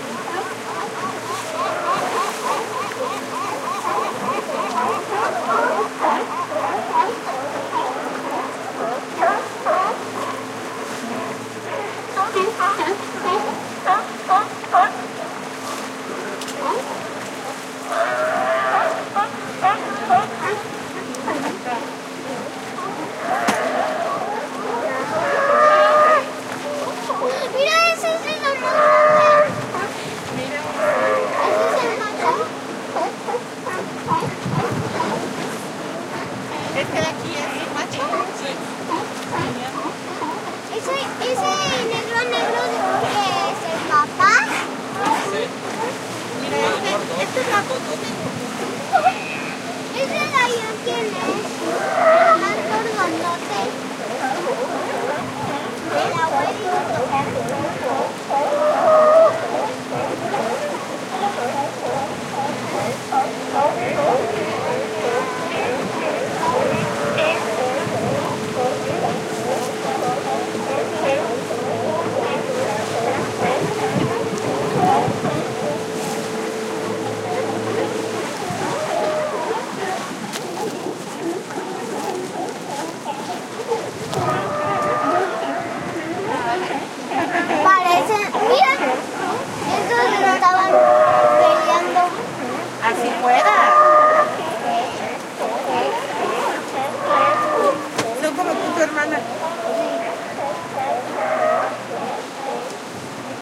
Ambiance near a Sealion colony + people talking in Spanish. Recorded from a small boat at Sea of Cortez, Baja California, Mexico. Shure WL183 mics, Fel preamp, Olympus LS10 recorder